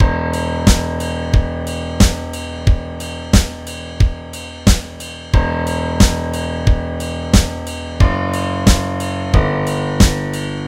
Dark drums for tense scenes.
Made in Mixcraft 8 Pro Studio.
Instruments:
Paino: Keyboard - Piano > Acoustic Piano
Kick: Percussion - Drums > Kick Drum
Snare: Percussion - Drums > Snare Drum
Cymbals: Percussion - Cymbals > Ride Cymbal
dark, loop, creepy, drum